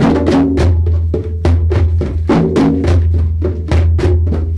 CongaGroup2 2turnsExtraBeat
Group plays a rhythm in an introductory hand drum class.
lofi, collab-2, noisy, cassette